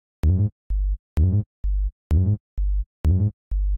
I made a track called Simple way to Rock, and i want to share the bass of that project, could be good to another producers or deejays
Bass, Vodka, Tech, Beatz, Minimal